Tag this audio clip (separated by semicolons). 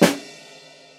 snare-drum; sample; drumset; snare; dataset